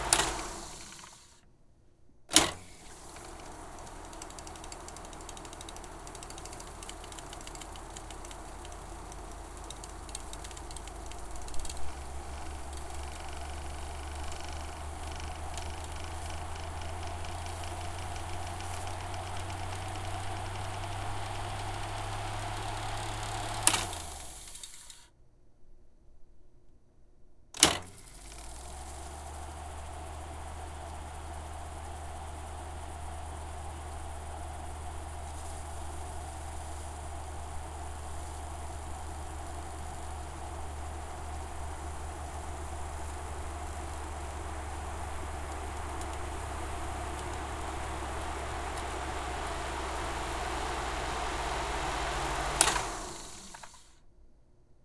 reel to reel tape machine start stop rewind ffwd mic further back no wind noise
ffwd, machine, reel, rewind, start, stop, tape